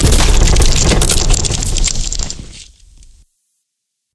Wooden Ship Break
Here is a simulated wooden ship colliding sound I made up
Recorded with Sony HDR-PJ260V then edited with Audacity